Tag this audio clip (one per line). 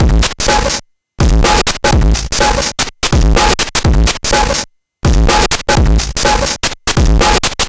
Chiptune
Electronic
Beats
Drum